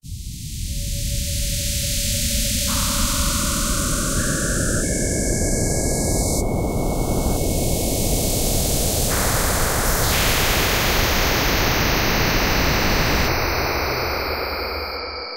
nostalgic sci-fi
This spectrogram was filled with cones and large gradiented spots which gave a nostalgic effect that people will notice if they've watched Star Trek.